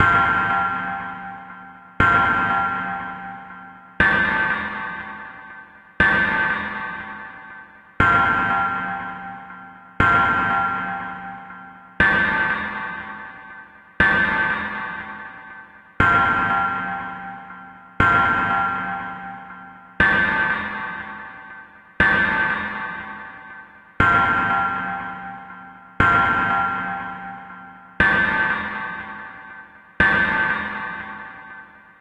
Click "Buy album" and put "0" as the price.
chase, ambience, horror, loud, hammer